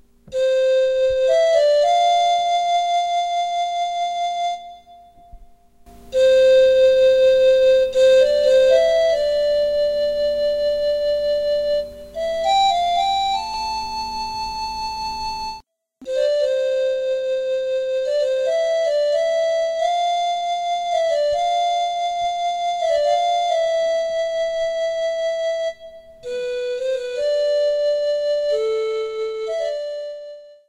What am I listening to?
A very nice melody wit a panflute